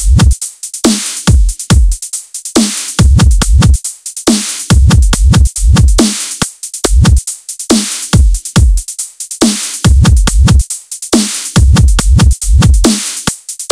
Dubstep Drums #2
Dubstep drums 140BPM
140BPM, Dubstep